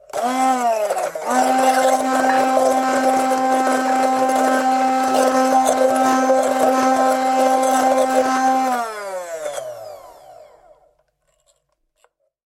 Food Blender 3
mixing, mix, blend, grind, mechanic, mixer, milling, electric, blender, food, industrial, appliances, grinding